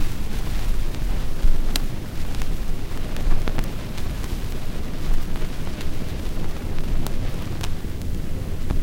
scratch298 vinyl noise looped
Vinyl noise (looped using looppoints). Technics SL1210 MkII. Recorded with M-Audio MicroTrack2496.
you can support me by sending me some money:
hiphop, loopable, record, looped, noise, loop, looppoints, dj, turntablism, vinyl, turntable